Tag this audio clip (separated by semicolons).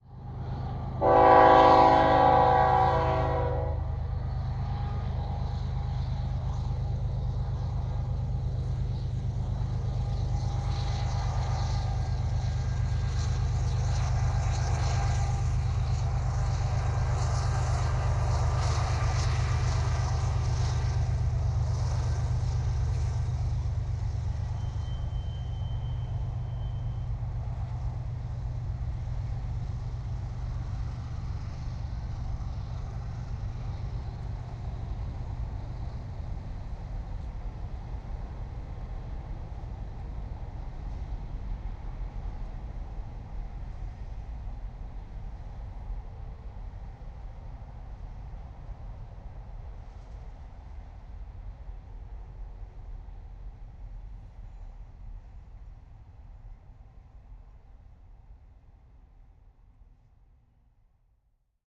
railroad; train; horn